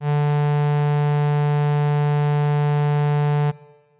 An analog-esque strings ensemble sound. This is the note D of octave 3. (Created with AudioSauna, as always.)